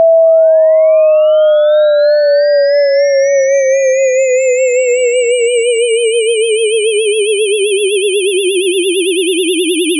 Generated with Cool Edit 96. Sounds like a UFO taking off.
mono, multisample, tone, ufo